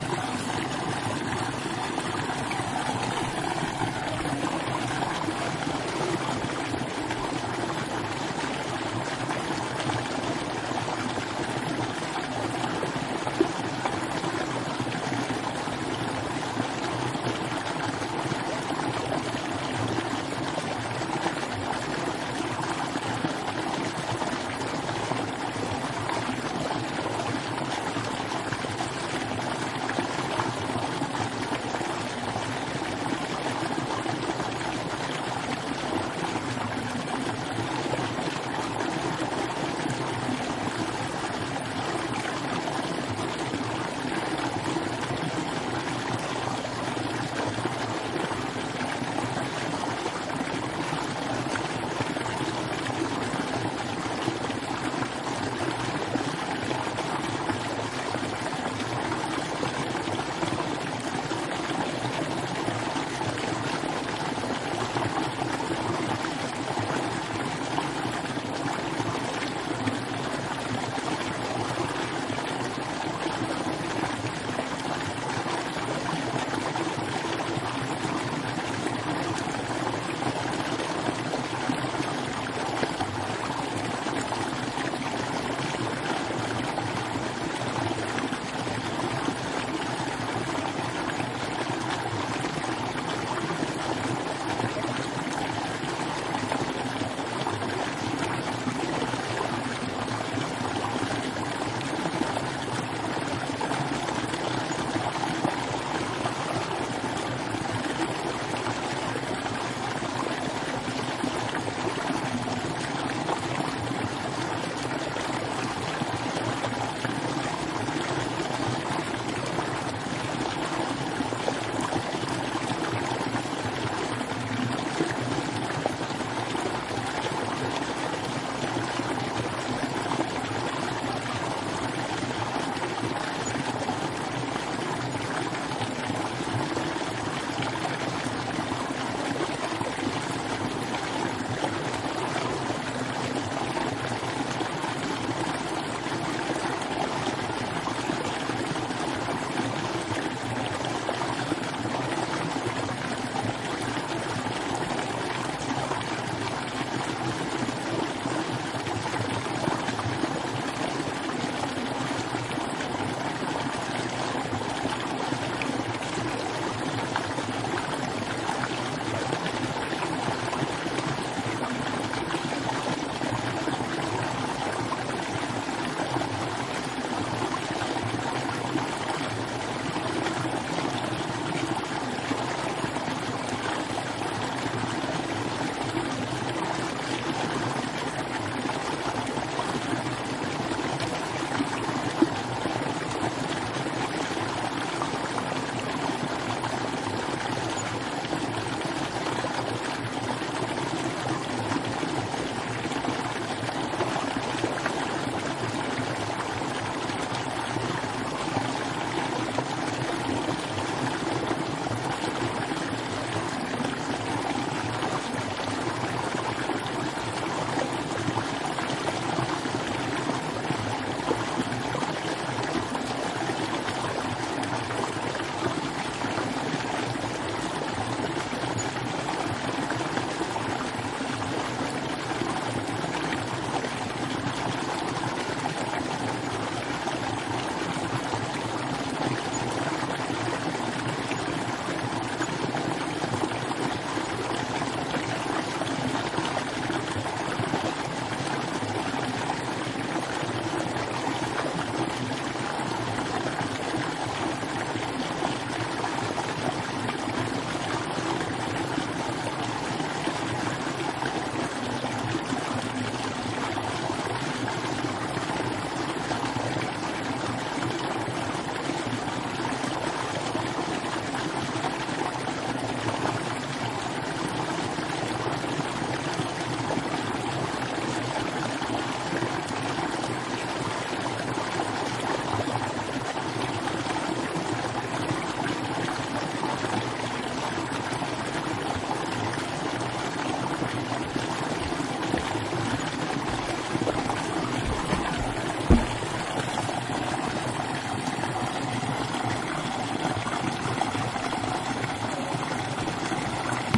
deep, a small stream in the woods rear
deep, small, stream